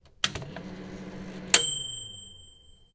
short sound, microwave finished